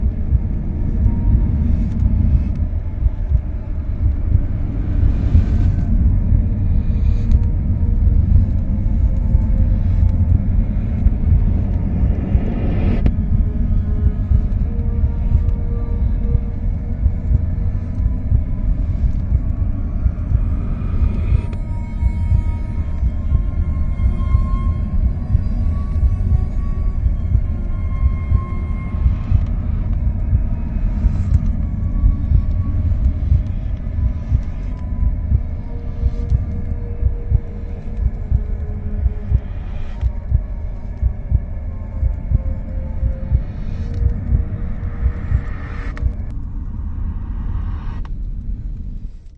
Death Magic prolonged
A sustained version of the death magic sound effect, originally used as an underlay on a voice effect.
death, fire, game-sound, hades, magic, magical, magician, spell, sustained, underworld, violin, witch, wizard